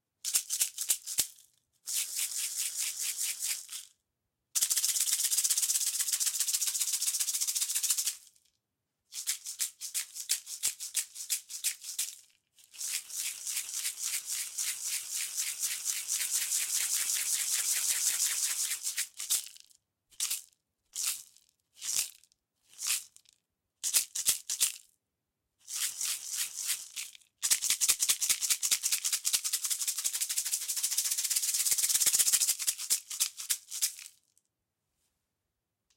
Small Bamboo Maraca
Bamboo, maraca, percussion, shake, Small, toy, wood, wooden